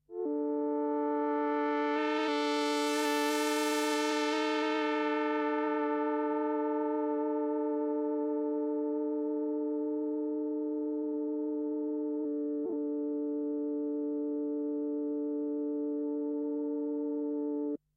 sad bit one
My Bit One having a tuning moment.
bit, one-analogue-synth-pad